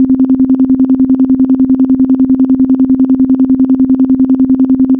nathalie le maitre 2014 s3
Do sinusoid
Effect Tremolo :
Waveform type : square
Starting phase (degrees) : 10
Wet level (percent) : 70
Frequency (Hz) : 10
sinusoid, effect, Tremolo, DO